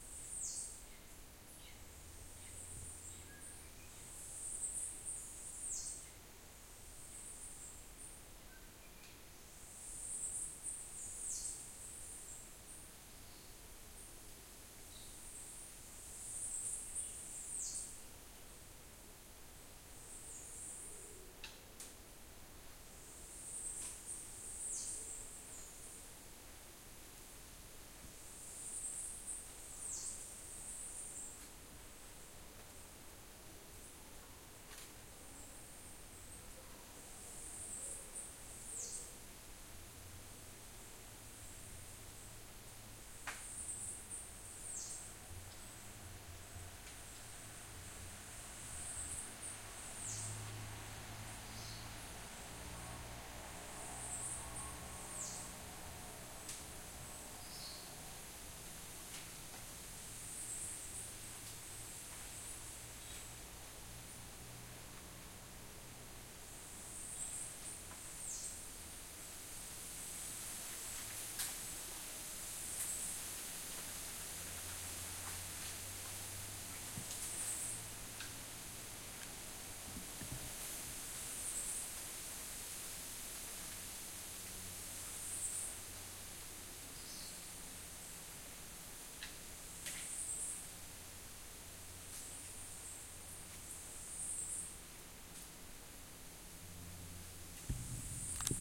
Ext, Birds, Forest, Lt Cars
Recorded 1/21/07 in El Yuque National Forest, Puerto Rico.
birds, exterior, puerto-rico, rain-forest